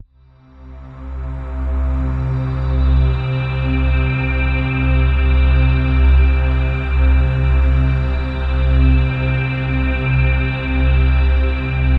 16 ca pad delorean
delorean low deep